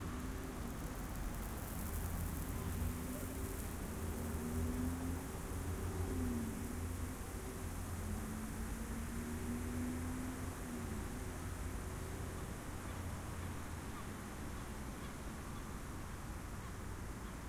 porto morning lawn sprinklers 09
Porto, Portugal, 19th July 2009, 6:30: Steady lawn Sprinkler between the Douro river and a road. In the middle of the sound the water hitting the concrete sidewalk can be distinctly heard. Car traffic passing by and seagulls in the end.
Recorded with a Zoom H4 and a Rode NT4
athmosphere, city, field-recording, morning, porto, seagulls, smc2009, sprinkler, traffic, water